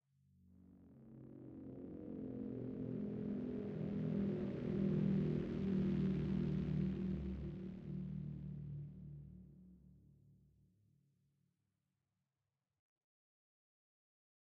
Simulation of an airplane passing overhead in an outdoor environment. Sound designed in Ableton Live using Ableton's Operator and Analog synthesizers, and native effects.